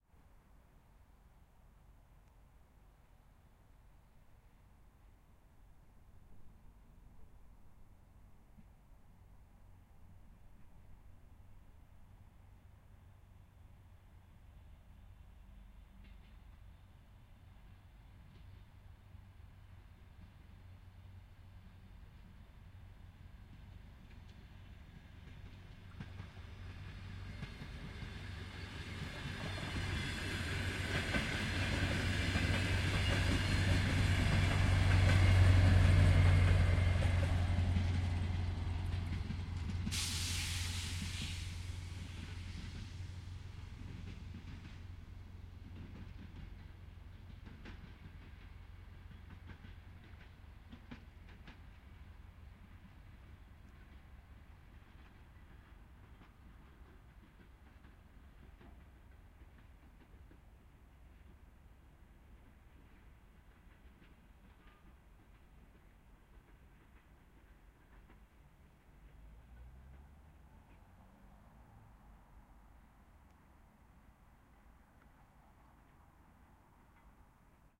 Train Passing By Slow Medium Speed R to L
Multiple takes of a train passing by.